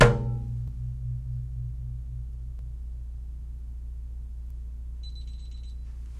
Sounds of a small office recorded with Olympus DS-40 with Sony ECMDS70P. Playing with a water jug as an entry alarm beeps.
field-recording, office